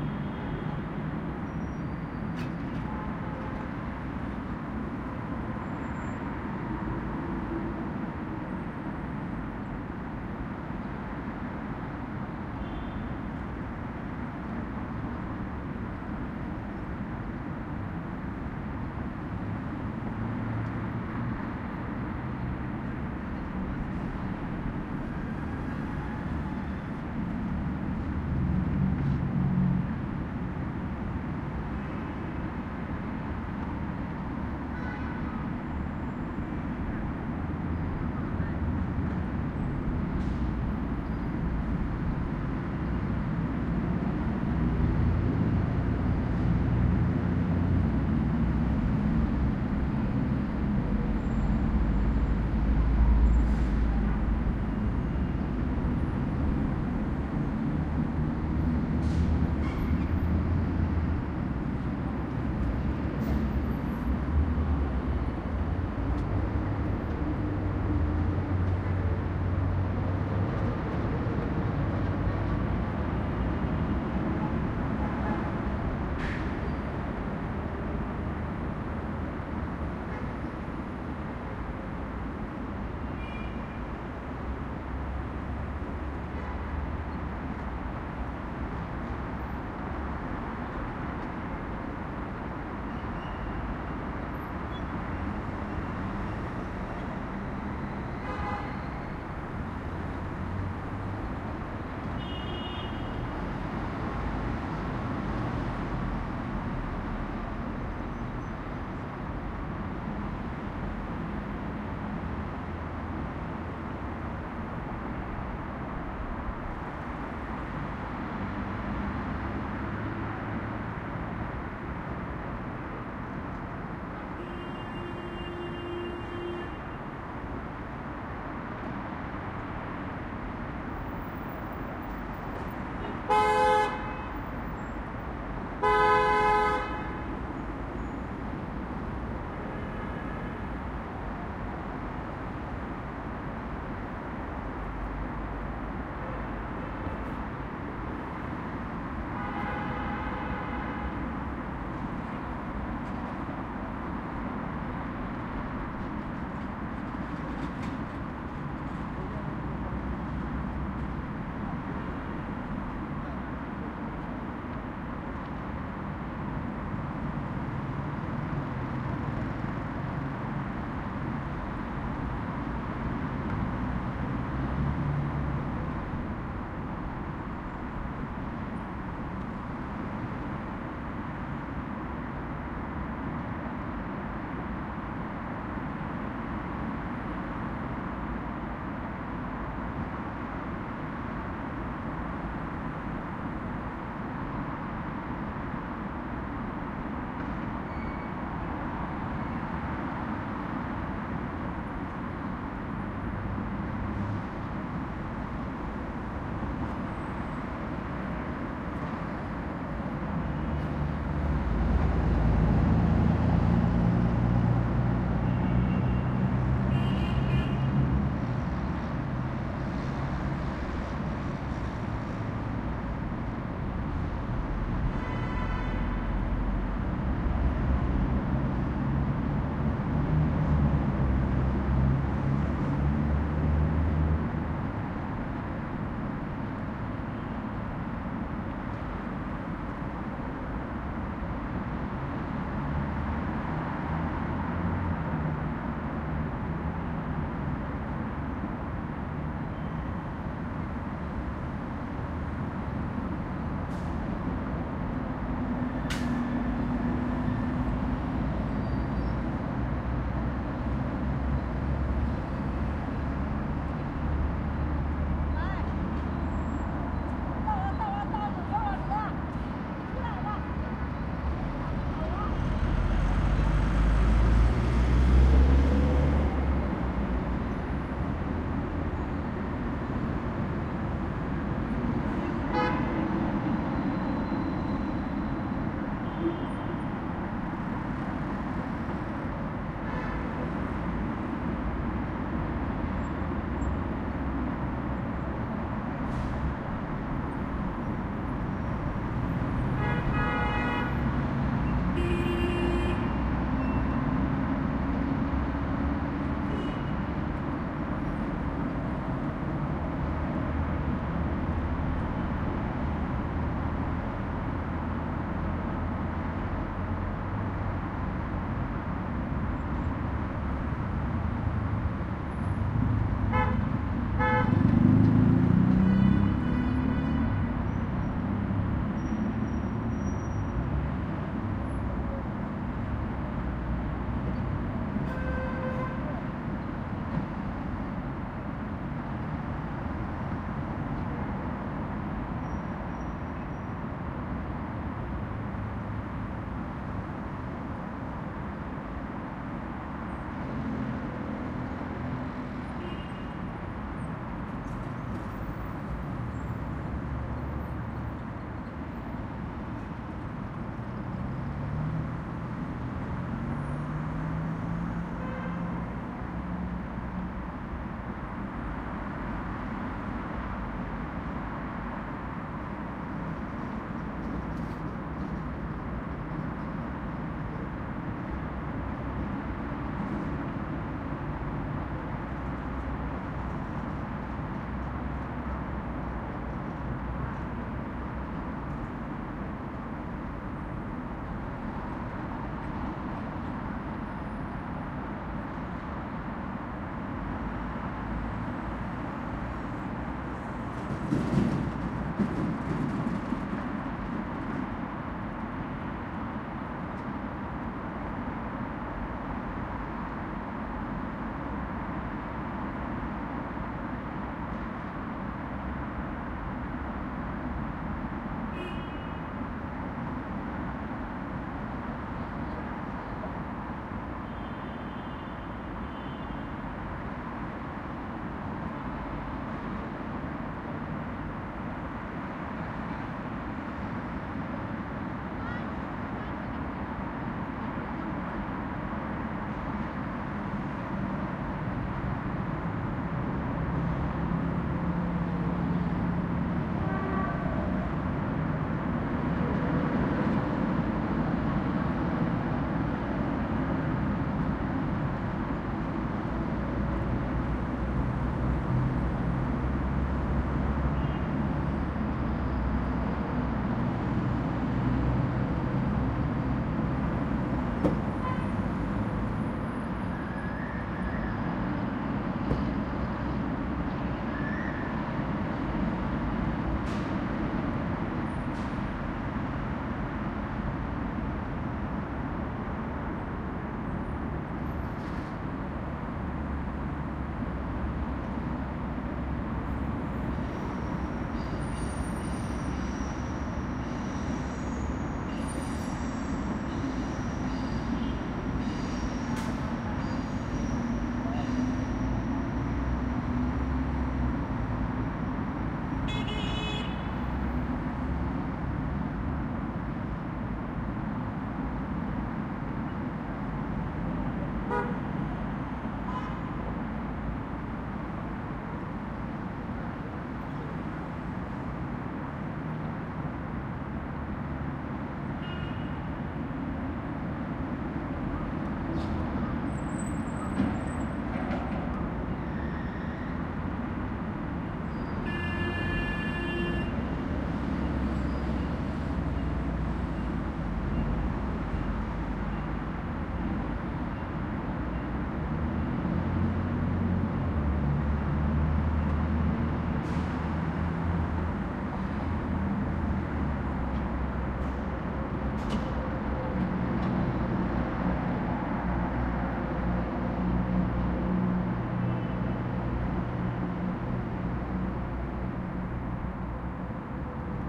Downtown traffic
Recording of midday traffic close to a busy downtown intersection in a major city. Sounds of various vehicles going by and car horns bleeping loudly. Recorded with a Zoom H1.